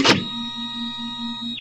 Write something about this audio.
Mechanical sound of a Kodak printer.
robot, robotic, mechanical, printer, kodak, hydraulic, machine